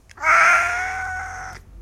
A big cat "meow". Recorded with a Tascam DR100 recorder; normalized to -3dB.
animals, cat, meow